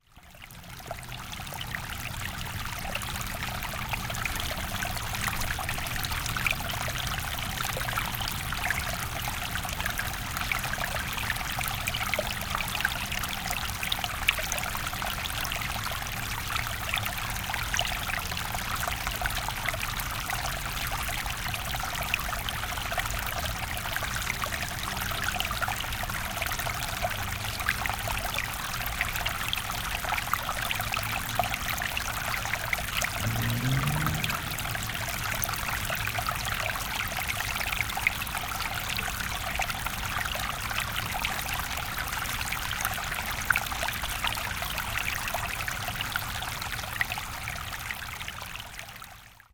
A small stream.
field-recording, nature, stream, water